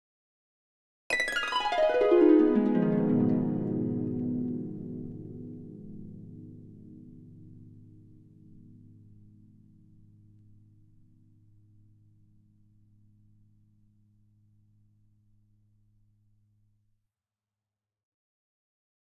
This is what happens when someone's dream is ending.
I created this Harp Glissando by using a Harp SoundFont that I created, Also recorded with the SFZ Player.